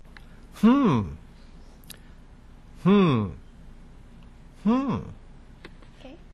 hmm oh
A man saying "hmm!" with the sense of "Oh, I see!"